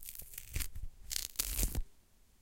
A very dry (not surprisingly) ripping sound. I tear beef jerky very close to two condenser mics. These were recorded for an experiment that is supposed to make apparent the noise inherent in mics and preamps. You can hear the difference in noise levels from the mics, as is one channel the noise is clearly louder.